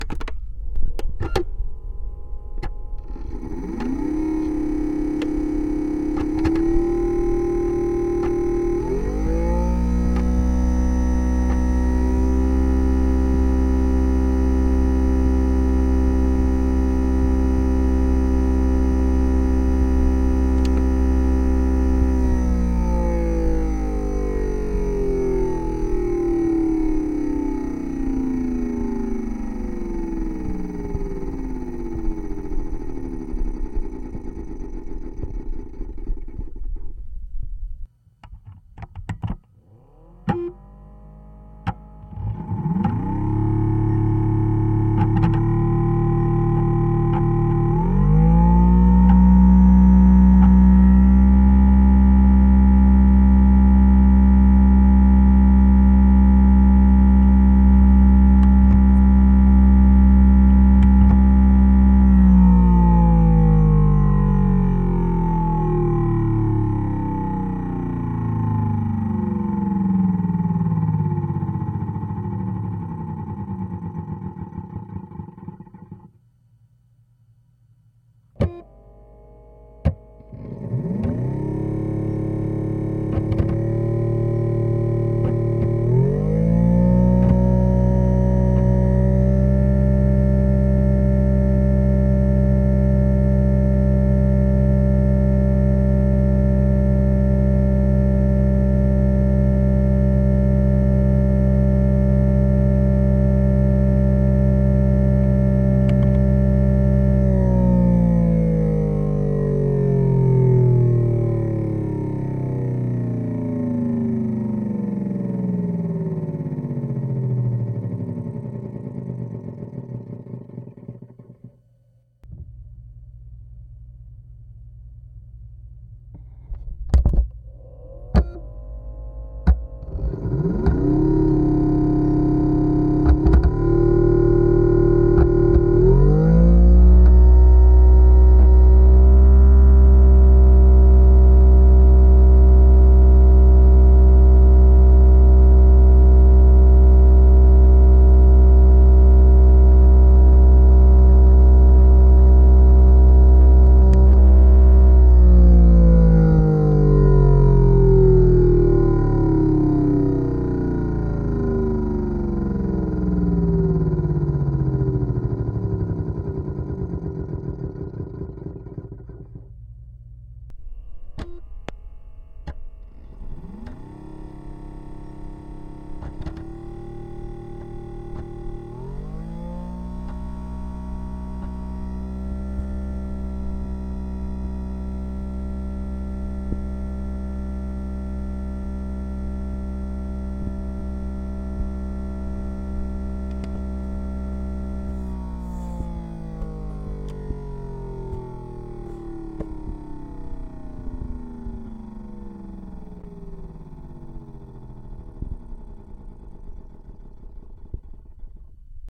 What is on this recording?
My Wii (which still works) is loud. I used a cheap contact mic on different points to get this recording.